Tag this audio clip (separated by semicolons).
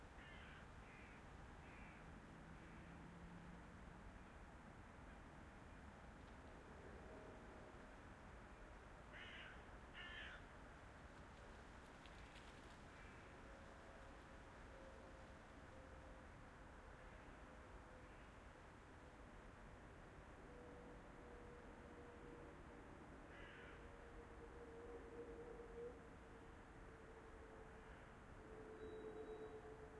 ambiance; ambience; binaural; c4dm; field-recording; london; park; qmul